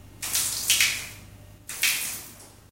pouring water on tiles